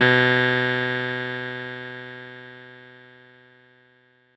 fdbck50xf49delay8ms
An 8 ms delay effect with strong feedback and applied to the sound of snapping ones fingers once.
Beware that the compressed preview sound renders a slight gargle which is not present in the original samples, that are clean.